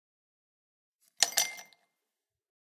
Putting ice cubes into glassWAV
Putting ice cubes into glass.